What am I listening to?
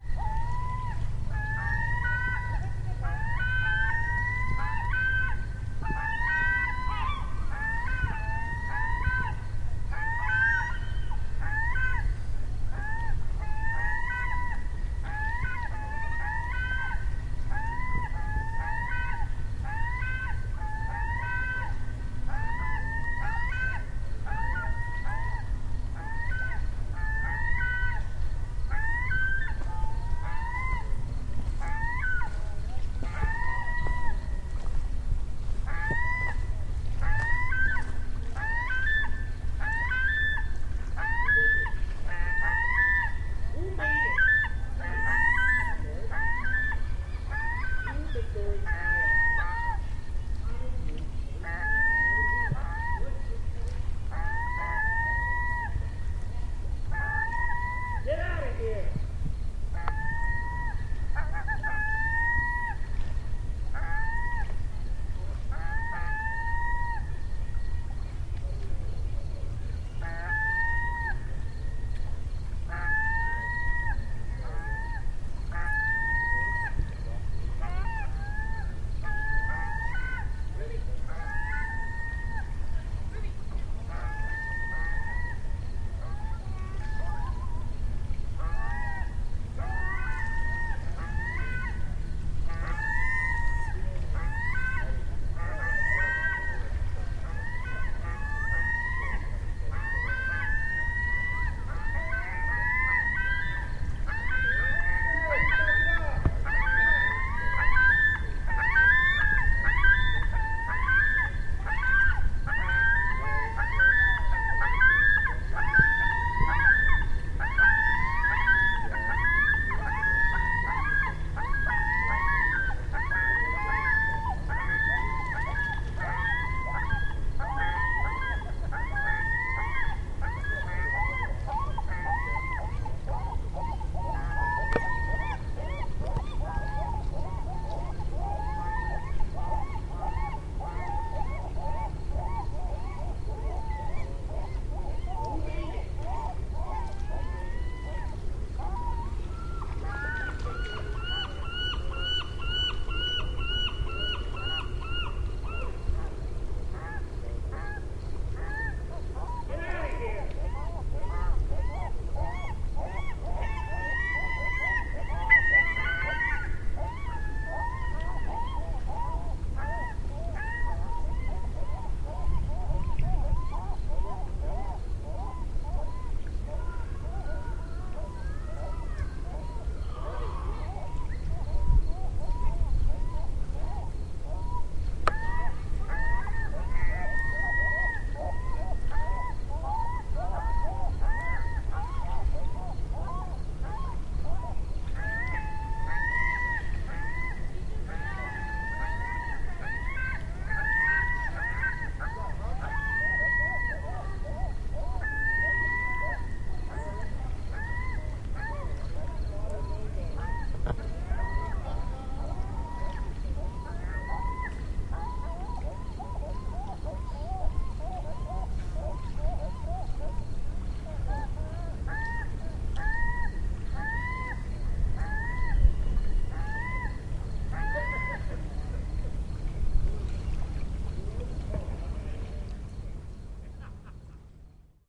A bunch of seagulls having a battle royale on a beach on Gabriola Island off the coast of BC. Recorded from a fair distance, so lots of ambiance of ocean etc. can be heard. Some people off in the distance shouting. Recorded with a Zoom H2